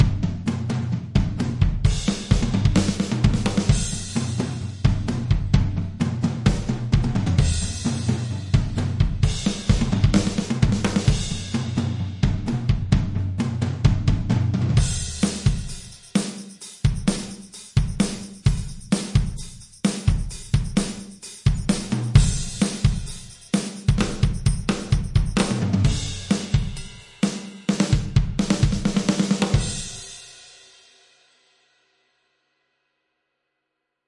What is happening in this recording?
Alternative Hard Rock Drums 130 bpm